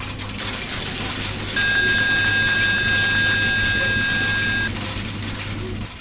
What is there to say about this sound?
MENEZ Corentin 2016 2017 Slots machine
I create this sound by adding 3 different sounds:
The first one is a sound from a coffee machine giving back the change. This sound melting mechanics noises and falling coins.
I duplicate this track 4 time, spatialized them and shifting them, creating richness effect.
I also add some echo, and accelerate the speed of some of these tracks for a more randomized effect.
The second one is the sound of shaken coins.
This one add some metallic sonorities to the first one and help to figure that the money is falling from the machine.
The last one is a sound of the ringing machine, saying that it’s a jackpot.
I created this sound on audacity with the risset drum, with a frequency of 1620 Hz. I duplicate it and add some reverb
Typologie : Continu complexe / itération tonique
1- Masse : Groupe de son cannelés
2- Timbre harmonique: brillant, éclatant
3- Grain: son rugueux, vifs, percutants
4- Allure: Pas d'effet de vibrato
5- Dynamique : attaques violentes, petits pics agressifs
casino, coins, jackpot, money, ring, slot